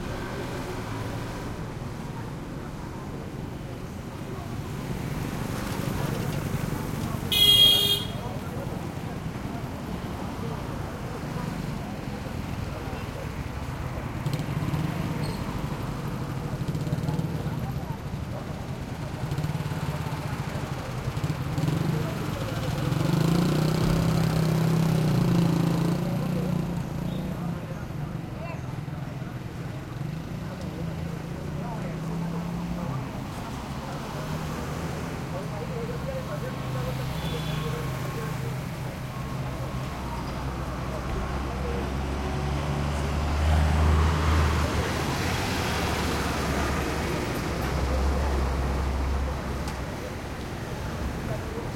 traffic medium busy street short with annoyed horn and motorcycle long throaty rev Saravena, Colombia 2016